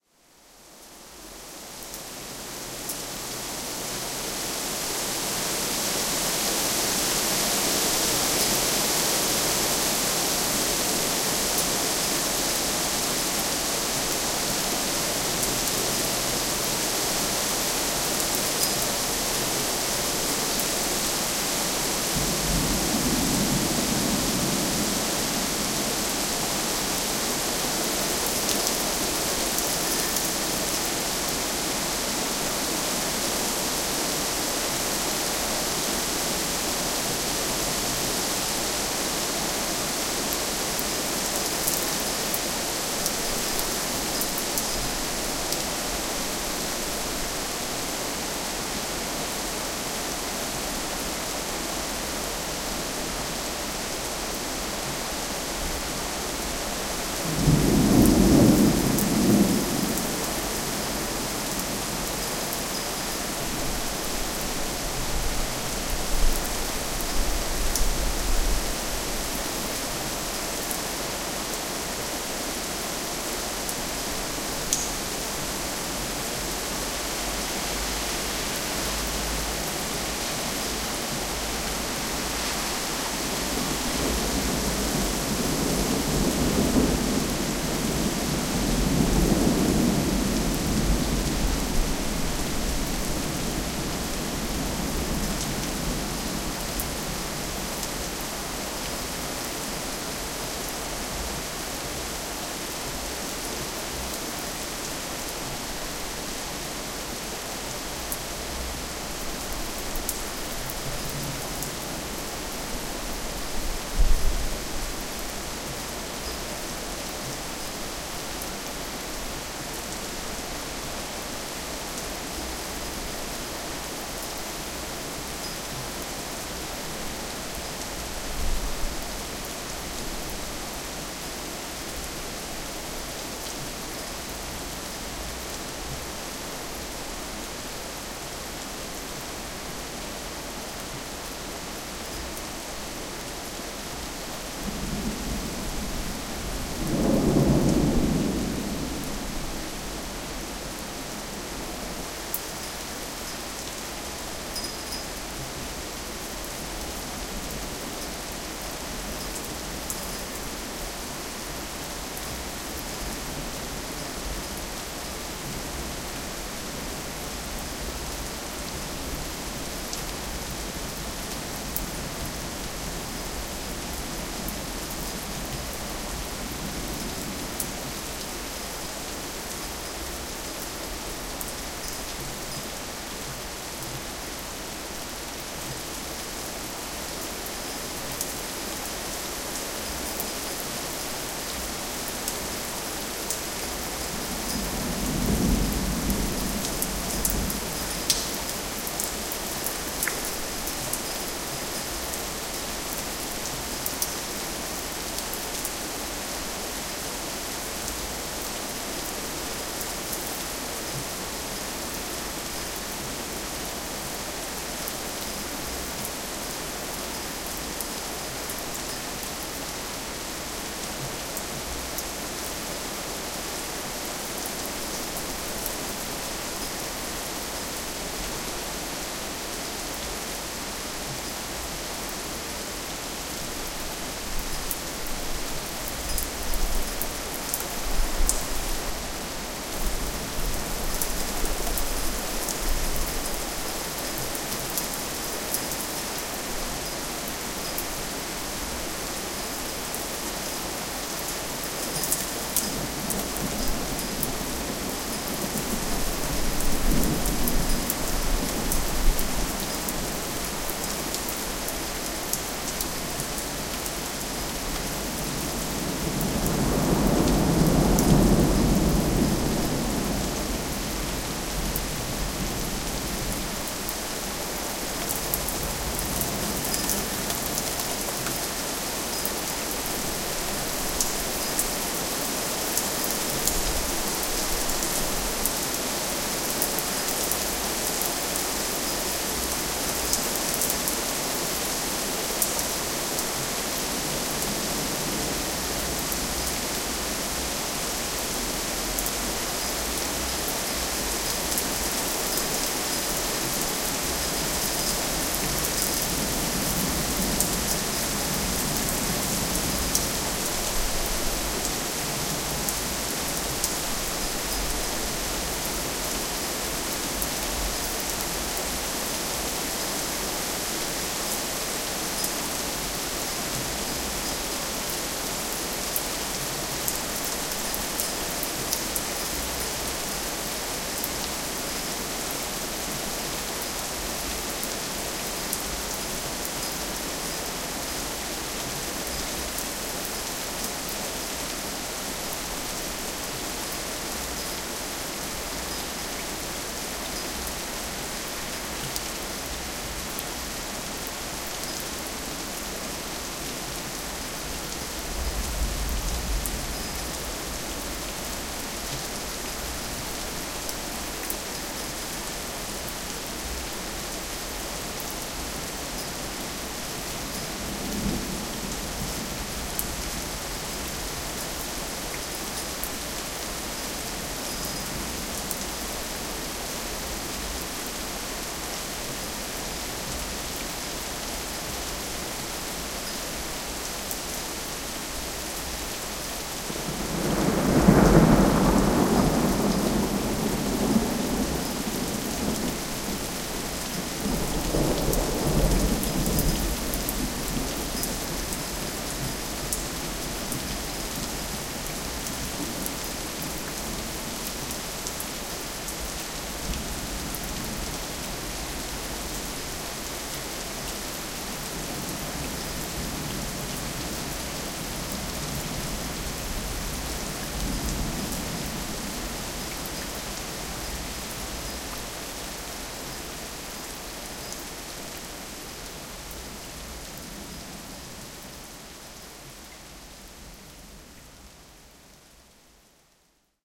Spring Night Rain - (Evosmos - Salonika) 03:07 15.05.12
Thunderstorm and heavy rain. I recorded this rain sample at 3 in the morning from the balcony of my house.
Using the Adobe Audition 5.5, I cut some low frequencies about 80-90Hz (a rumbling noise from PC), also increased >7050 Hz freq. region at 2.8dB and I added, 60% Reverb, 20% Exciter, 30% Widener, 20% Loudness Maximizer from the "Mastering Effect".
Enjoy!
Relax, Rain, Thunder, Water, Environment